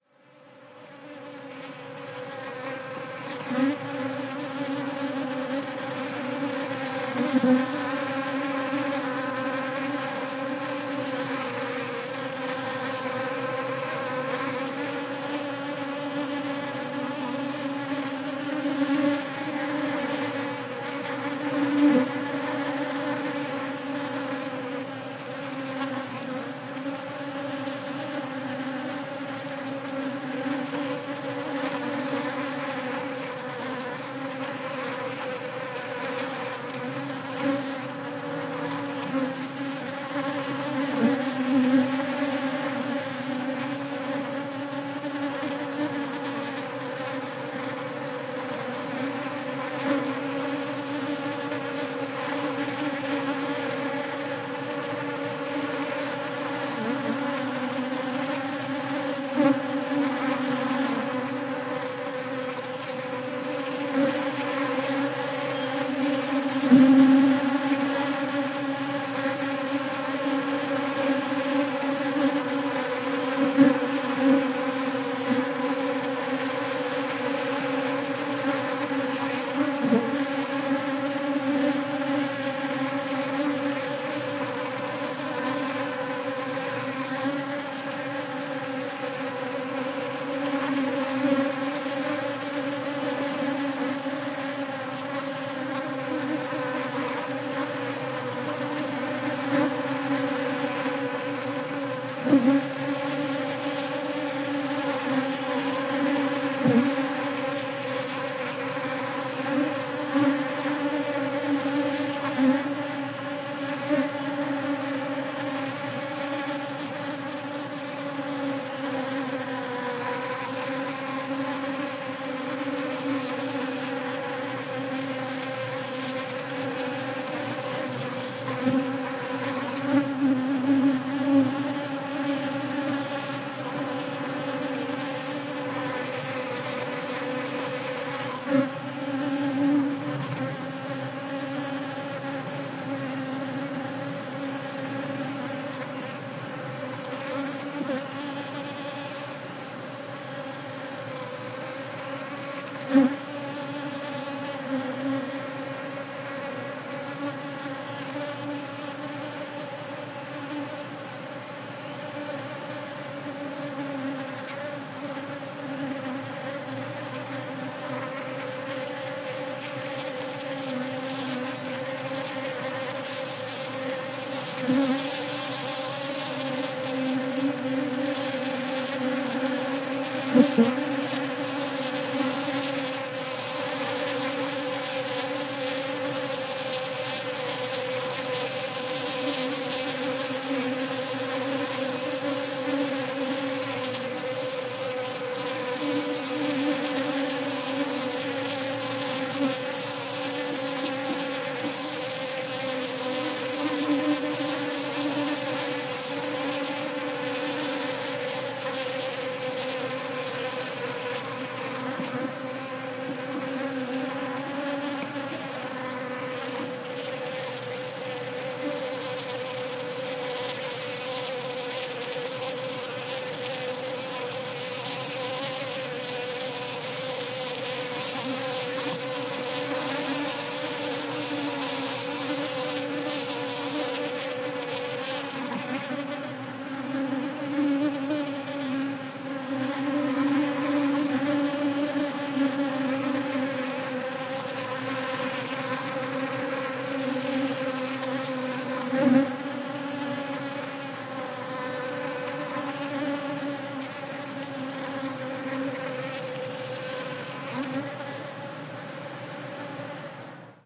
Bees gathering pollen of brugmansia flowers in Nairobi, Kenya, 15 November 2018
Recorded with a Zoom H6 and ssh6 shotgun microphone, I applied a high pass filter to suppress all frequencies below 115Hz (to avoid useless humming and the sound of several vehicles passing by). You can sometimes hear some birds and insects in the background.
nature, bee, bees, Africa, field-recording, Kenya